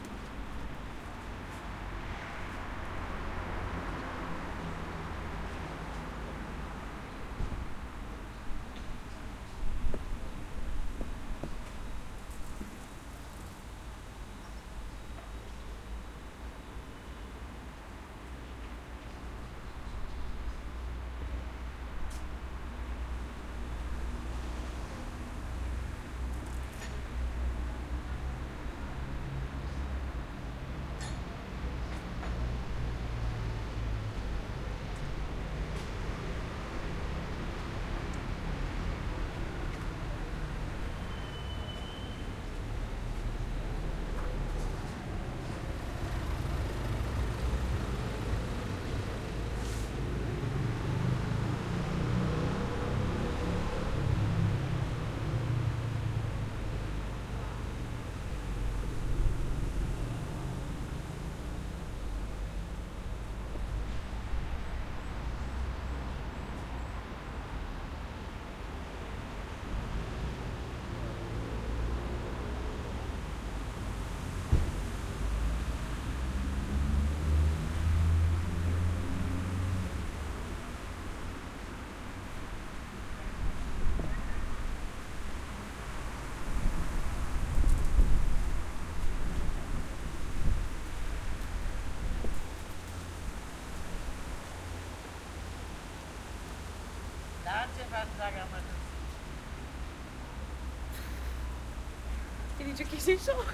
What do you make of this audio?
Ambience Urban PgMaritim Sant Carles de la Rapita
Urban Ambience Recording at Passeig Martitim, Sant Carles Rapita, August 2019. Using a Zoom H-1 Recorder.
SantCarlesRapita, Urban